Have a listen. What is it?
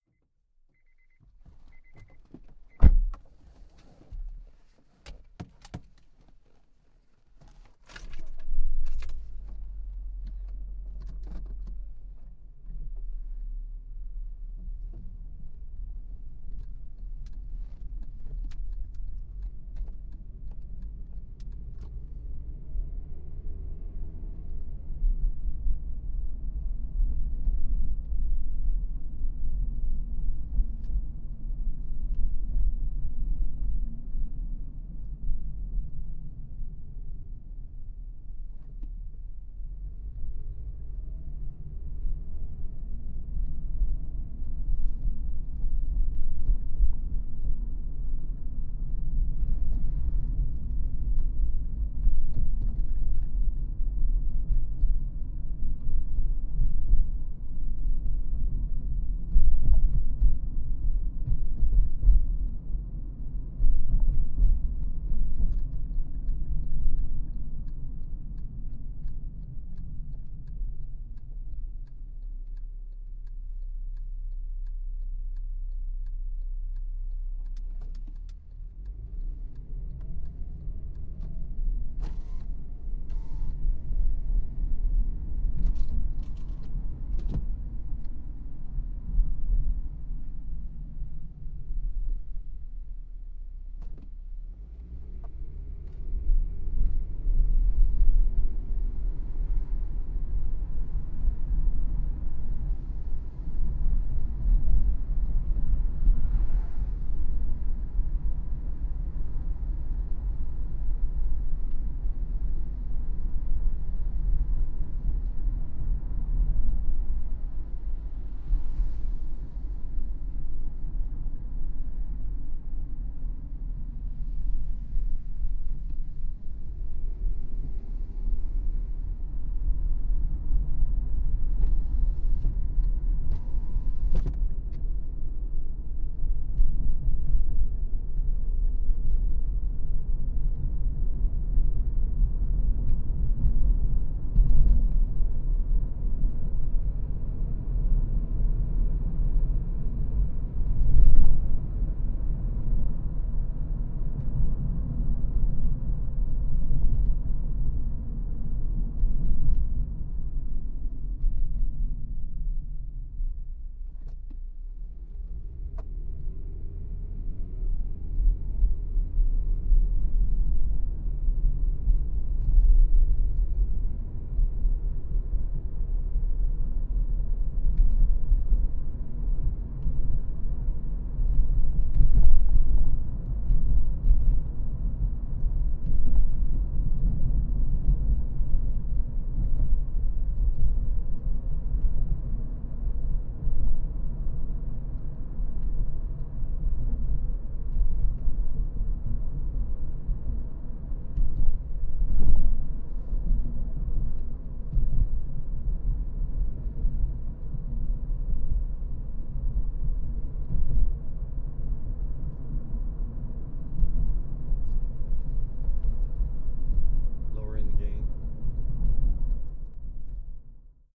CAR GETIN and drive Z
ambisonic WXYZ. mono Z track of ambisonic. Car interior driving. Windows open and shut different surfaces. gravel. smooth road and bumpy. low to medium speeds. backup at end.
driving, interior, ambisonic